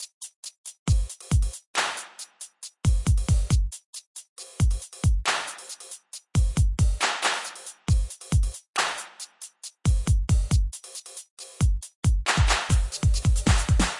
32 bar drum2
Made in FL Studio 10s FPC drum machine plugin and do not know how to, if possible apply each shot to the mixer so this is UNMIXED